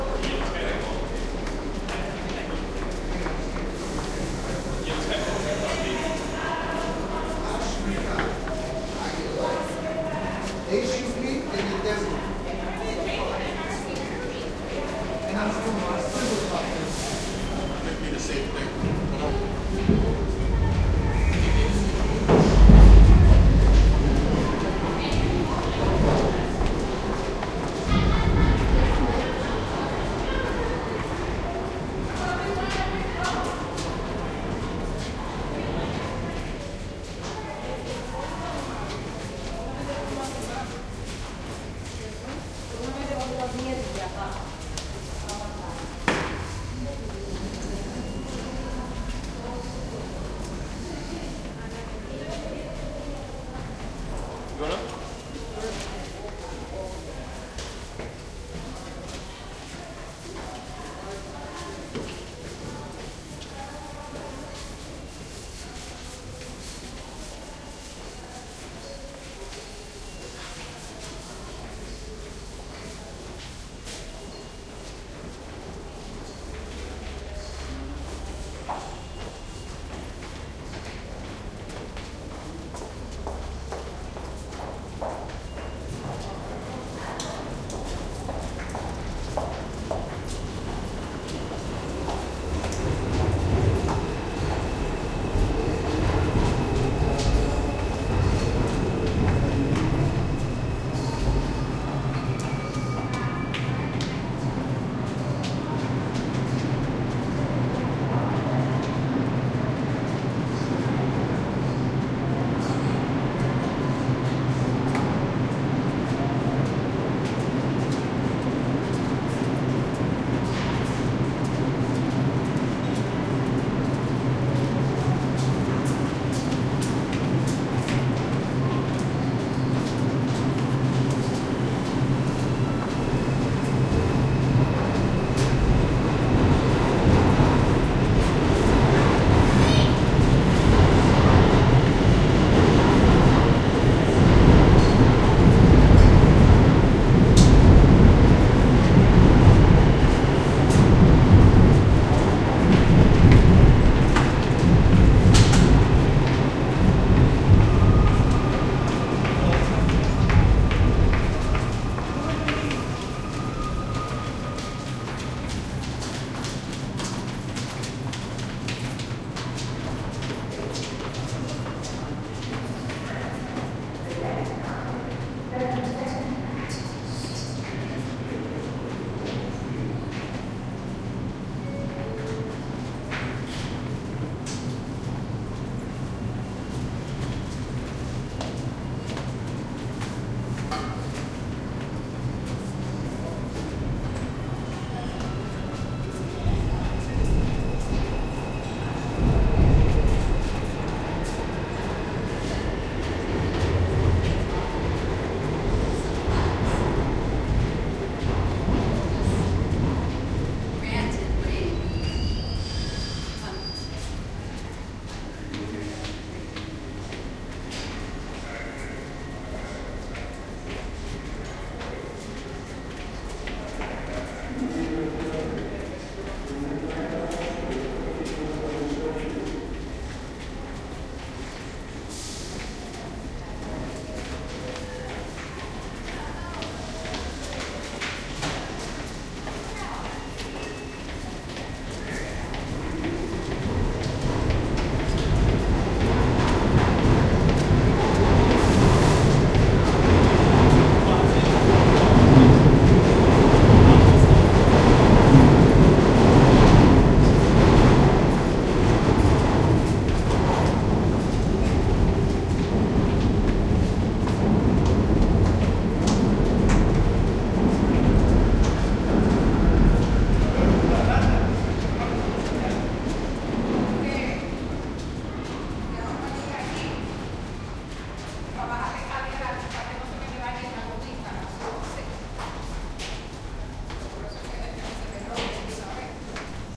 subway tunnel +trains passby bassy NYC, USA
bassy, NYC, passby, subway, trains, tunnel, USA